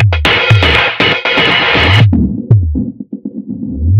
20140306 attackloop 120BPM 4 4 Acoustic Kit Distorted loop1c
This is a loop created with the Waldorf Attack VST Drum Synth. The kit used was Acoustic Kit and the loop was created using Cubase 7.5. The following plugins were used to process the signal: AnarchRhythms, StepFilter (2 times used), Guitar Rig 5, Amp Simulater and iZotome Ozone 5. Different variations have different filter settings in the Step Filter. 16 variations are labelled form a till p. Everything is at 120 bpm and measure 4/4. Enjoy!
120BPM, beat, dance, distorted, drumloop, electro, electronic, filtered, granular, loop, rhythmic